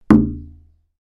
a knock on a wooden door